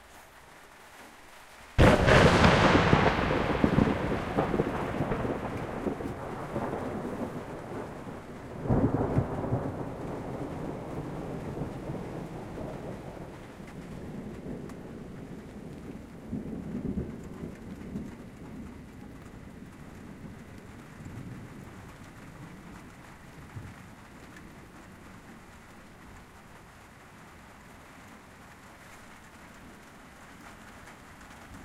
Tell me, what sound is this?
Huge Thunderclap
A thunderclap that struck perhaps only 100 metres away. It is sudden and loud with many reverberant rumbles occurring afterwards. There is some rain noise on a tin roof throughout the recording.
This sound has a few problems. It was just too cool of a sound to throw away, so I fixed it up as best I could.
Someone near me screamed during the first second of the thunder. I tried to reduce the scream as much as possible without decreasing the sound quality, but it can still be heard if you listen closely.
There was also a bit of clipping, but I fixed that with Audacity's repair tool.
Recorded 17/09/2019 with a H4n Pro onboard stereo mics
Edited in Audacity 21/01/2021 and 16/06/2021